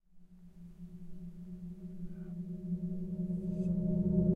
efan grill - airy metallic hit - reverse
An electric fan as a percussion instrument. Hitting and scraping the metal grills of an electric fan makes nice sounds.